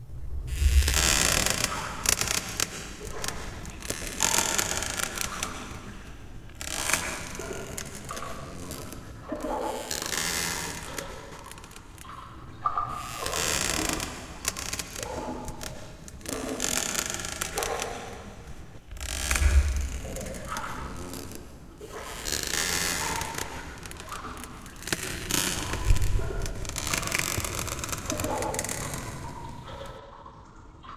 Added more elements. phony ocean, I think.